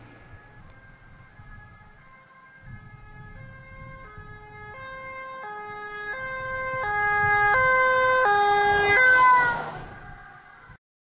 Ambulance drive-by, recorded with camera microphone
siren, Ambulance, speed, driving